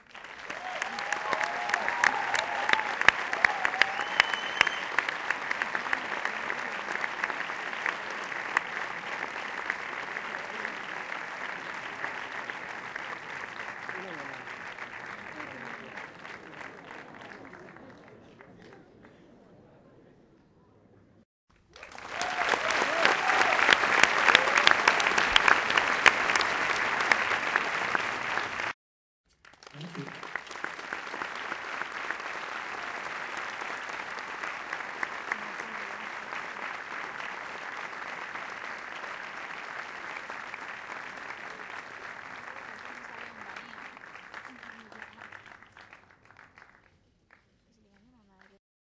applauses theatre woo woohoo hooligan
Different applauses for different movies and conferences
applause, hooligan, theatre, woo, woohoo